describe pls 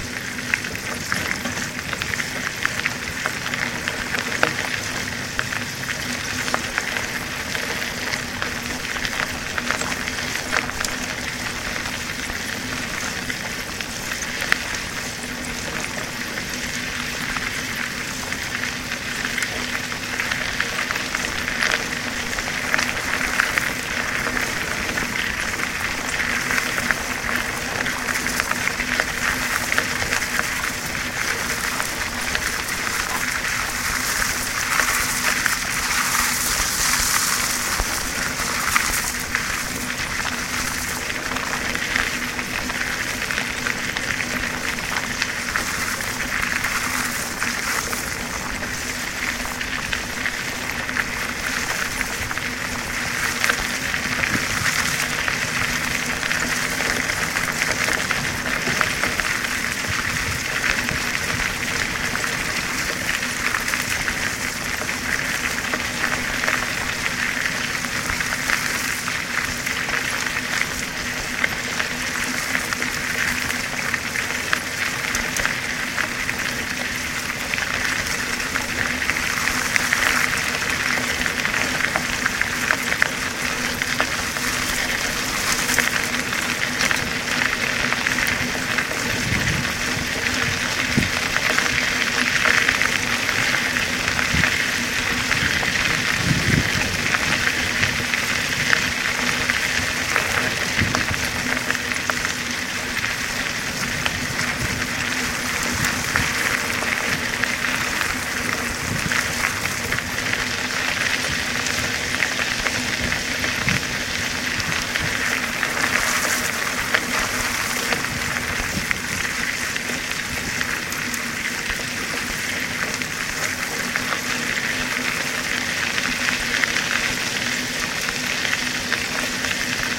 2015.09.11 Mountain bike on gravel
Riding my mountain bike on gravel trail at Baylands Nature Preserve in Palo Alto CA. Recorded on my Galaxy Note 2 cellphone. Edited in CyberLink Power Director 13. This is actually two separate tracks equalized differently to accentuate the crunch of the gravel, and to minimize wind noise. Output in mono.
field-recording, gravel, mountain-bike